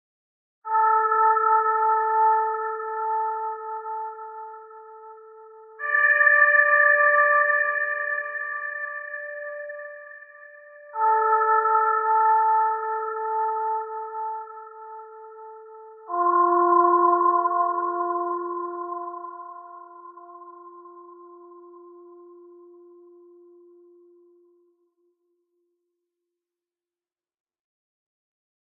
Notes A5+D6+F5, Tempo140, Shrinked, Limiter, Soundgoodizer,MultibandComp-Bright, Equo-Random bank 6, Delay-3 echoes, MultibandComp-Mastering 2dB, Reverb-Ambiance, Effector-Reverb.
noise, phantom, ambient, sinister, soundscape, background, ambience, white-drone, background-sound, terror, white, drone, Gothic, terrifying, atmosphere, thrill